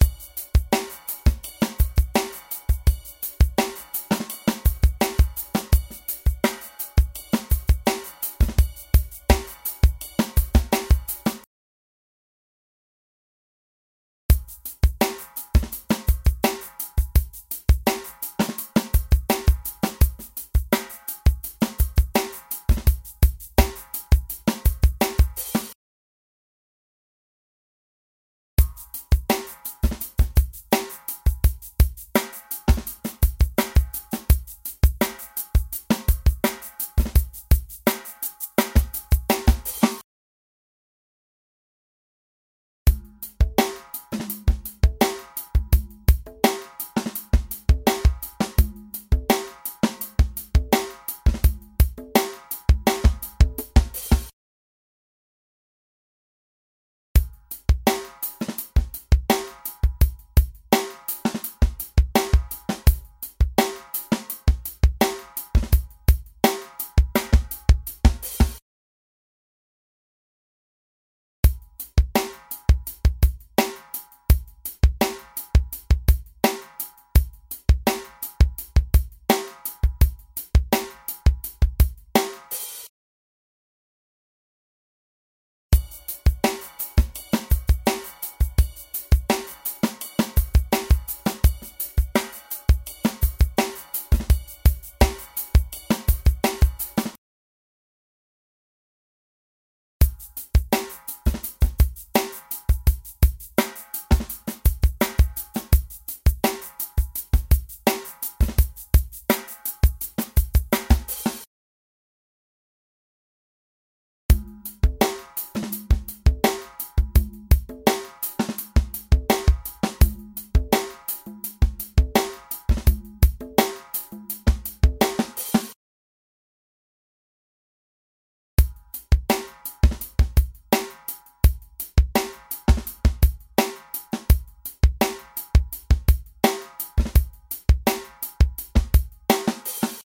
funky drum loops.84 bpm
drum loops,funky